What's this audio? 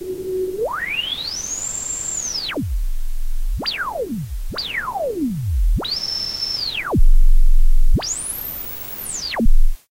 This was a sound created from a brown noise generated in reaper media. This sounds like a person trying to find a station on a radio. This was recorded in a tascam dr-40. This was edited on Reaper media.